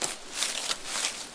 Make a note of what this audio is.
Searching through a small pile of leaves.
dig, digging, impact, leaf, leaves, outdoors, rustle, rustling, search